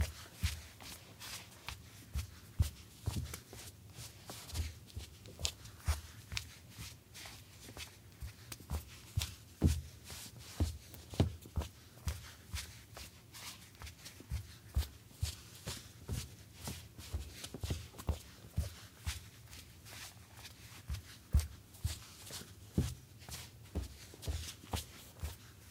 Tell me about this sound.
Footsteps, Solid Wood, Female Socks, Flat-Footed, Medium Pace